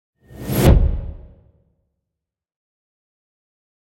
I recorded a lot of sounds in the area, and edited them into a series of thrilling sound effects.